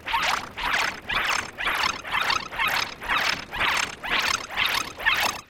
Alien Alarm
A weird sound I made accidentally. Good for warning sirens aboard a UFO.
I recorded my voice saying "Testing one, two, three," having that play on three simultaneous tracks with a slight offset, then reversing, speeding up, and adding several phasers and repeats. (I use Audacity)
alien, sci-fi, space, ufo